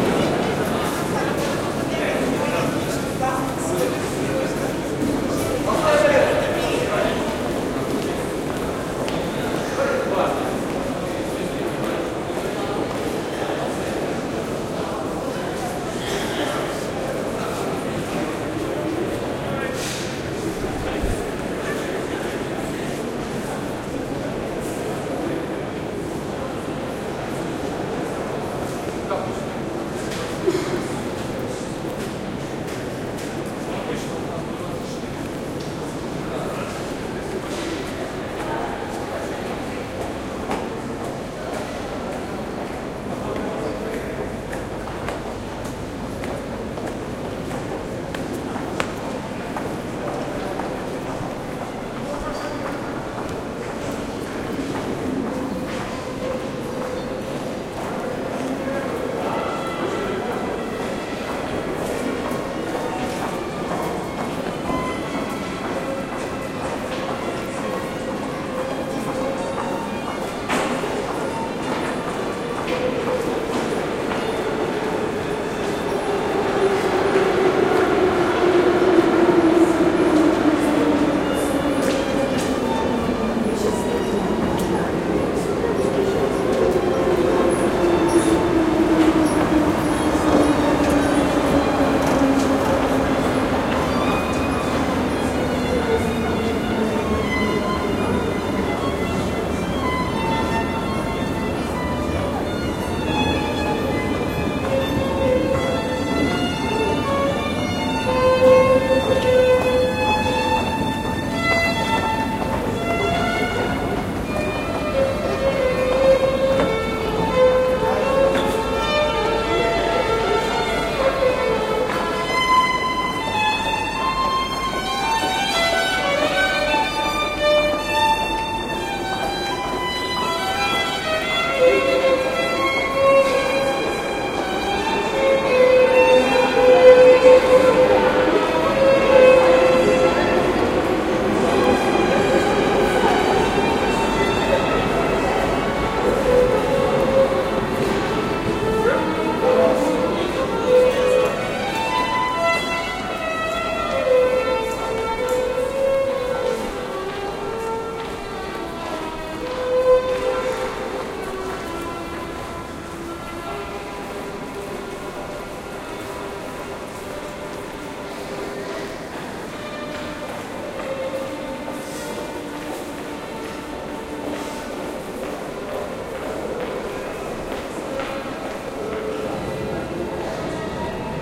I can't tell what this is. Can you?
IGNI0430string
Recorded while passing by in underground passages of Moscow underground tunnels. Sound of crowd walking, talking and street musician playing string instrument in specific echoed space. Recorded on the Olympus DS-750, manual level, non edited. Recorded in the end of April 2015